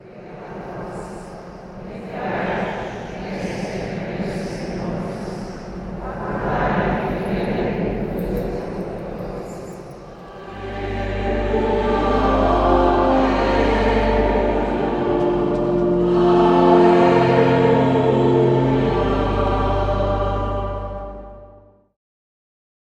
ChurchNoise PrayerandChoir Mono 16bit
Prayer and choir
16, bit